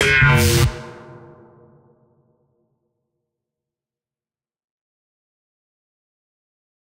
Lazer Pluck 3
Lazer sound synthesized using a short transient sample and filtered delay feedback, distortion, and a touch of reverb.
alien, beam, buzz, Laser, Lazer, monster, sci-fi, spaceship, synth, synthesizer, zap